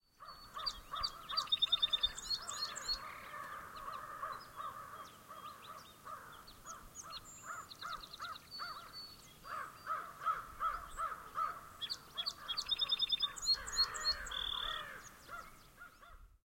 Song Sparrow (Melospiza melodia), Recorded early April 2010 in Lorne, Nova Scotia.
singing, song, sparrow